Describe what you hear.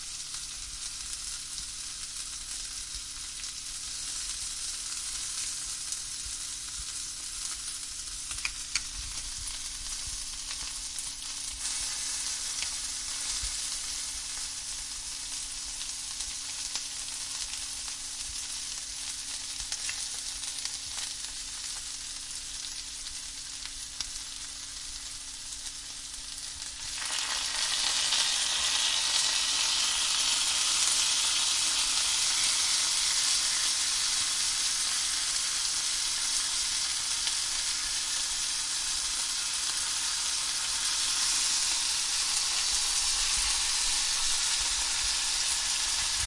pan fry1
Frying meat on the pan